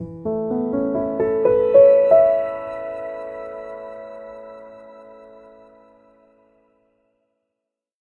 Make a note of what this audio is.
Simple Piano Logo
elegant, logo, piano, rising, short, up